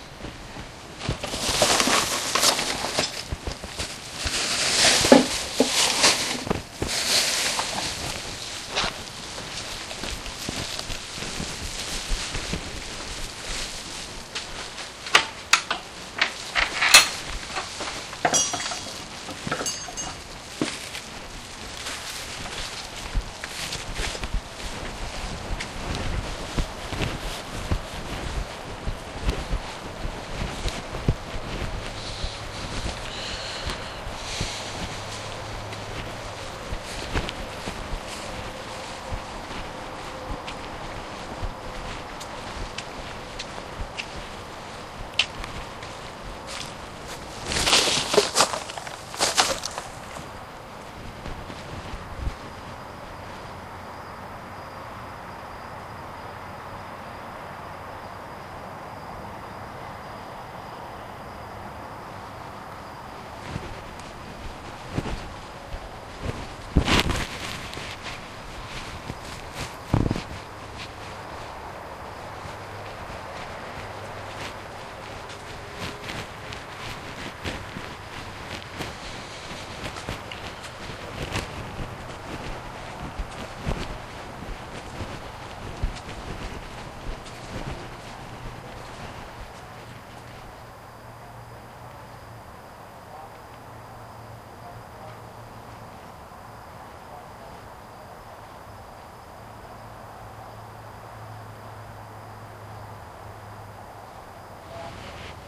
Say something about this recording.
Taking out the trash with the DS-40 recording.
field-recording, garbage, trash